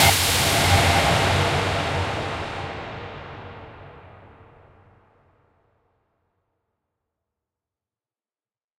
Wet Air
action, awesome, budget, cinema, cinematic, deep, design, dope, epic, film, free, hit, horror, impact, low, low-budget, mind-blowing, movie, orchestral, raiser, scary, sound, sub, suspense, swoosh, thrilling, trailer, whoosh